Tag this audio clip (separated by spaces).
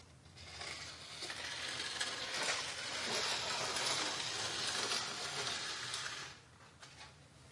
binaural floor paper scrape